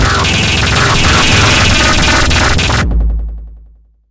Terror Harsh Noise
Harsh digital fuck-up.
static, painful, error, destruction, rhythmic-noise, electric, digi, noisy, terror, harsh, electricity, noise, digital, digital-noise